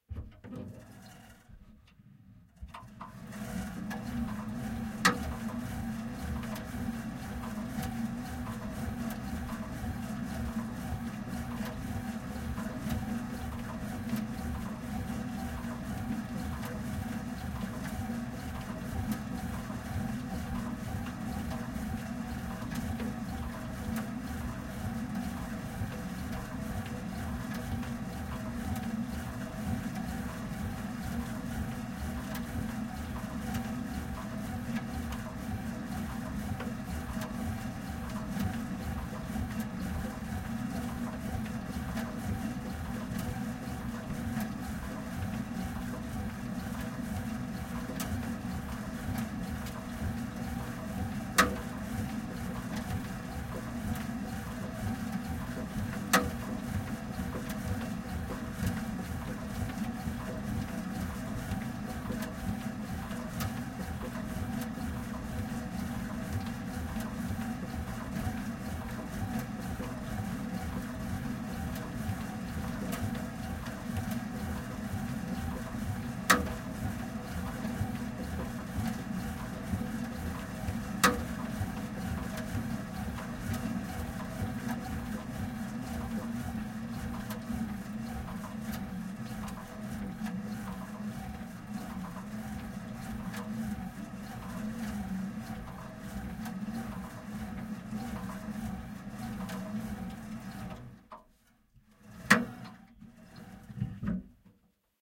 cross-trainer piezo
exercising on a cross-trainer at medium speed.
2x piezo-> TC SK48.
cardiovascular, cross-trainer, exercise, exercising, fitness, health, healthy, machine, Piezo, stereo, workout, workout-machine